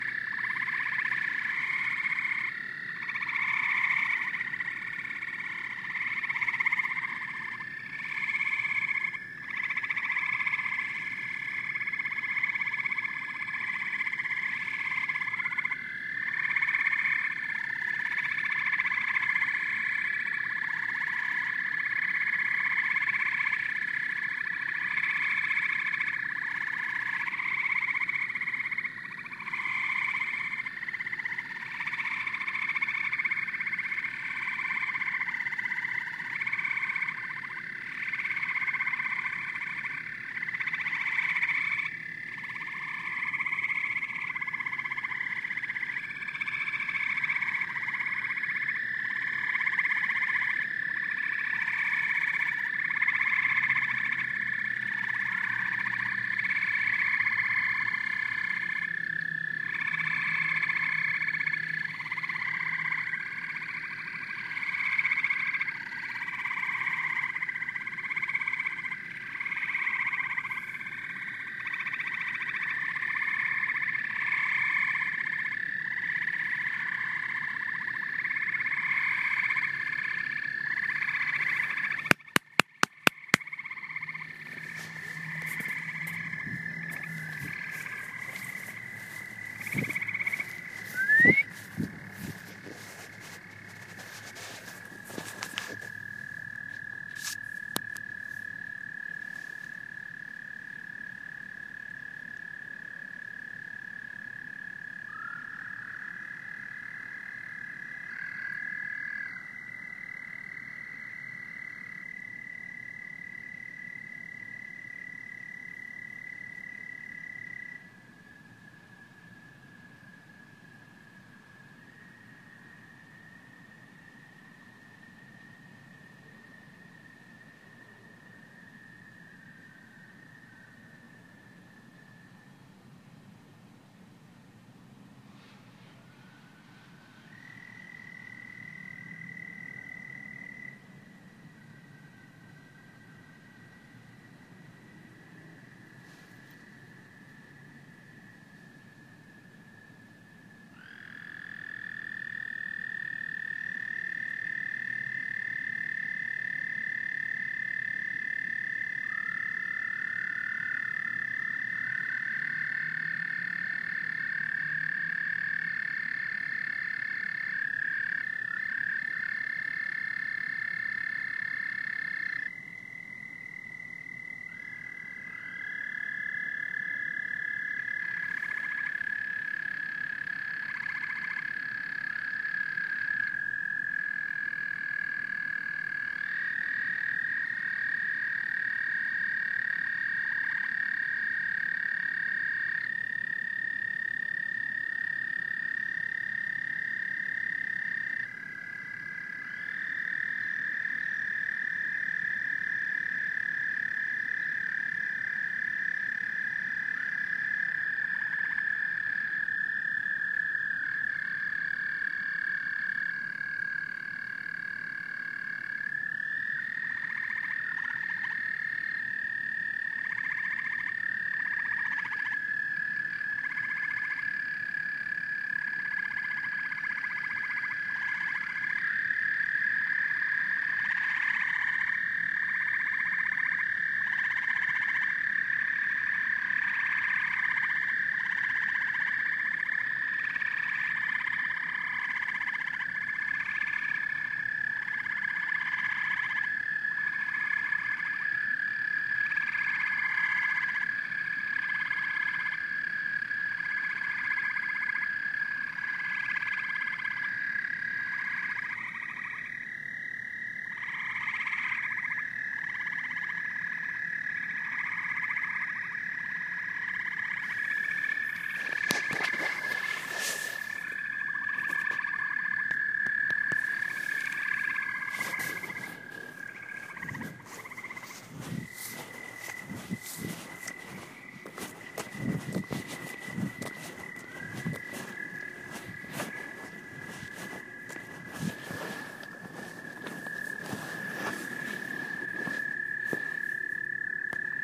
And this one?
Frogs Toads April 10 2017
Five minutes of frogs and toads singing on a warm spring night in Illinois. Halfway through, I clap and whistle to stop the frogs, but the toads keep singing, so there is a part in the middle with only toads before the frogs start up again. Recorded with an iPhone 4 at close range. Surprisingly good quality, I think.